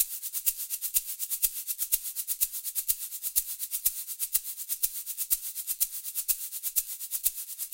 Jerusalema 124 bpm - Shaker - 4 bars
This is part of a set of drums and percussion recordings and loops.
Shaker rhythm loop.
I felt like making my own recording of the drums on the song Jerusalema by Master KG.
rhythm, loop